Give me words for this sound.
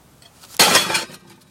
throwing can
Throwing a can into a box filled with other cans.